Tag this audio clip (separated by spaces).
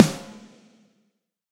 processed real drum sample snare